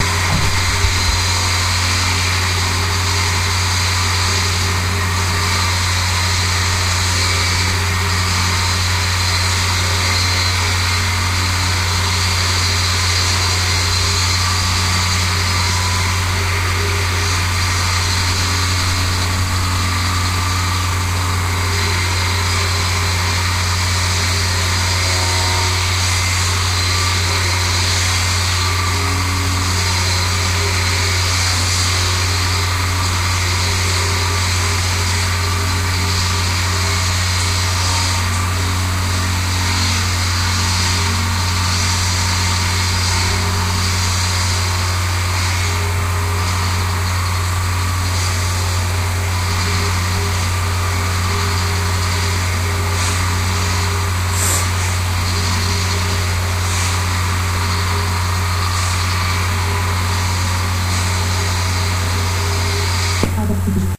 geotagged metropolis sounds berlin -noize from the street- roadworks senefelderstraße / göhrenerstraße 09.10.09 -14.25 o´clock
berlin, geotagged, sounds